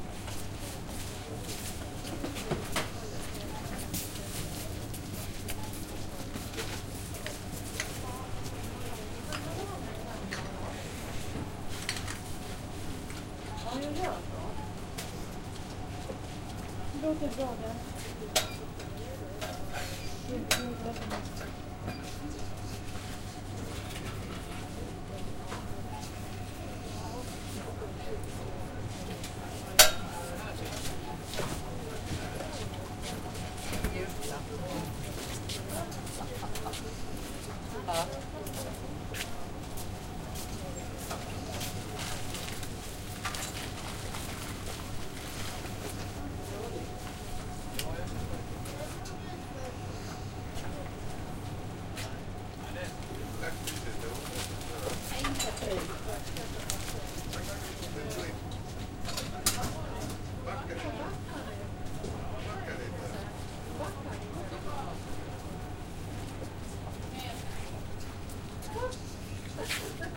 Food store
A recording in a supermarket-store and there are people paying for food at the cashier and they are coming and going.